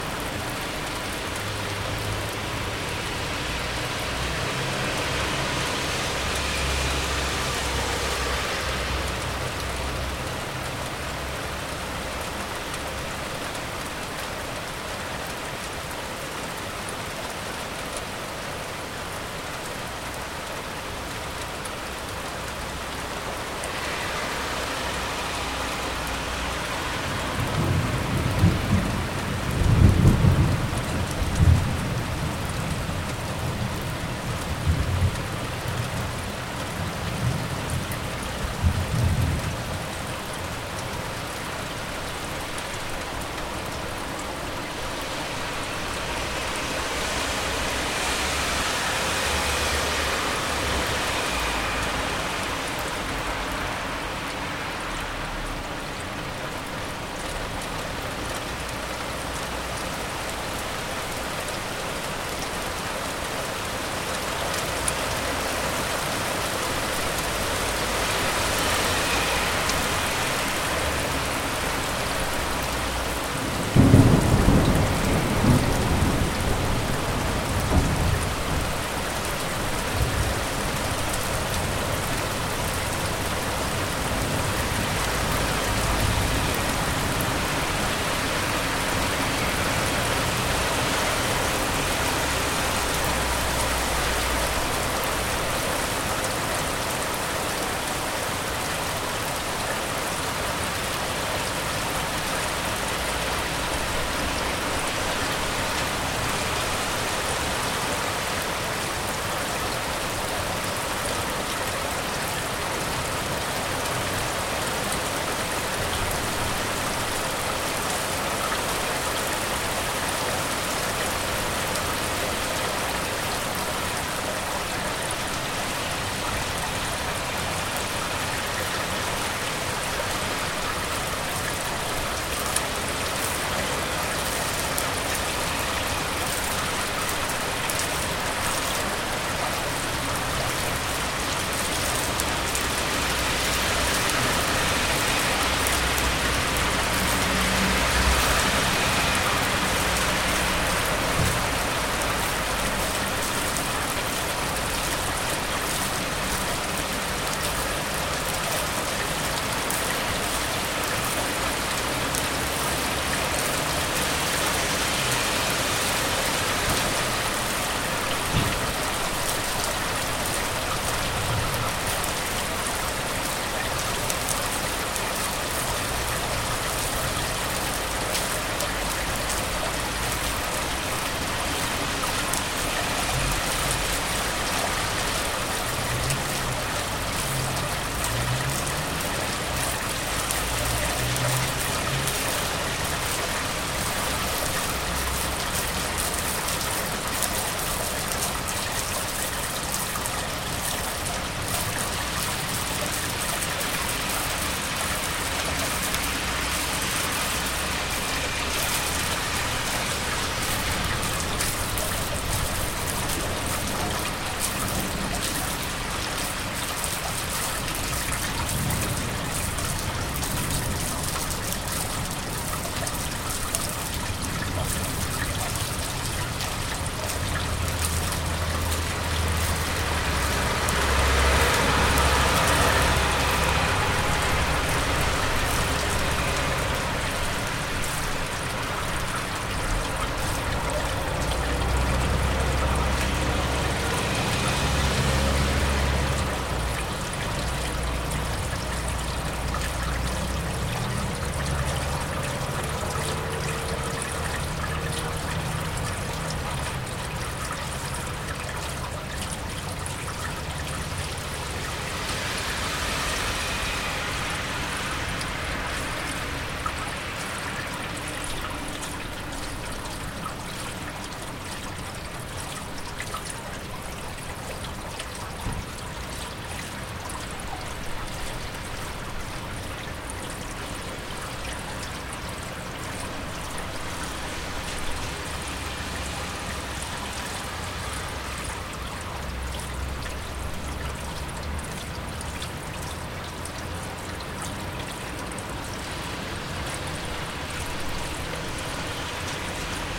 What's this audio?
rain traffic thunder
Rain, traffic and some distant thunder. Recorded in Solna, Sweden.
rain, traffic